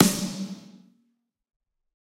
Snare Of God Wet 026
realistic, drumset, set, drum, kit